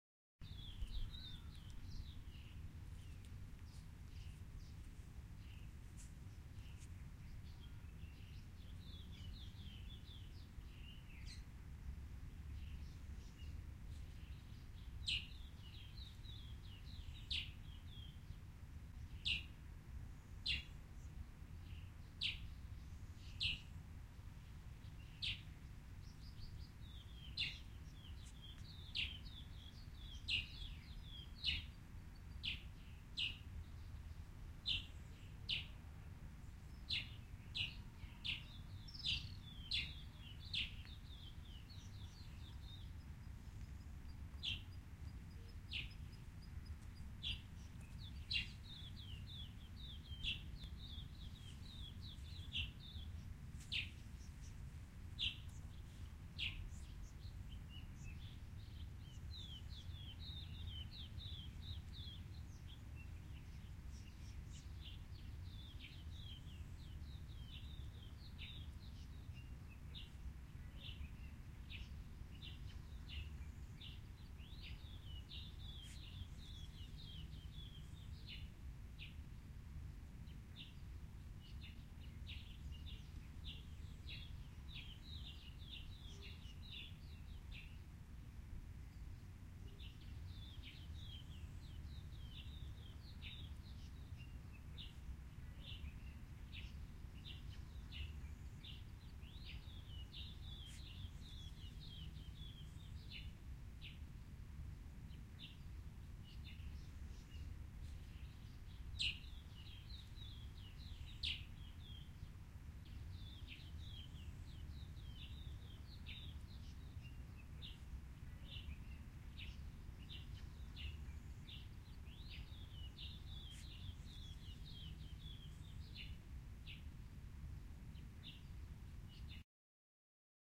Nature ambiance: Birds chirping, subtle wind and trees, nature sound. Subtle ambiance. Recorded with Zoom H4n recorder on an afternoon in Centurion South Africa, and was recorded as part of a Sound Design project for College.
ambiance, ambience, ambient, atmo, atmos, atmosphere, background, background-sound, birds, nature, owi